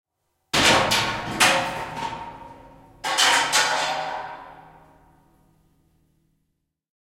Metal Chair Smashed on Concrete in Basement
Smashing a chair on a concrete floor in a big room. Recorded in stereo with Zoom H4 and Rode NT4.
basement, chair, concrete, crashing, floor, large, plastic, room, smashing